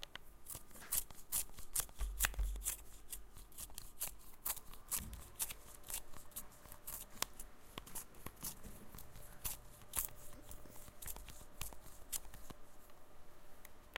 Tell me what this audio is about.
mySound Sint-Laurens Belgium Puntenslijper
Sounds from objects that are beloved to the participant pupils at the Sint-Laurens school, Sint-Kruis-Winkel, Belgium. The source of the sounds has to be guessed.
Belgium, mySound, Puntenslijper, Sint-Kruis-Winkel